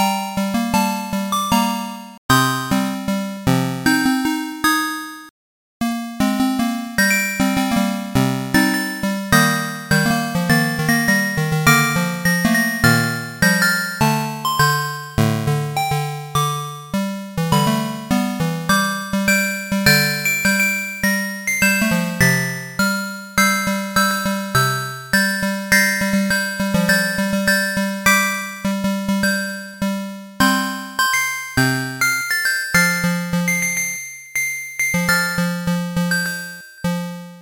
Why don't you just DIE
Created via a neural network, science is great huh.
Chiptune 8 bit song
bits, music, electronic, nes, 8-bits, mario, electro, chiptune, beat, techno, loop, 8, house, eight, track, rhytm, bass, song, bit, bassy, sega, rhythmic, gabba, 8-bit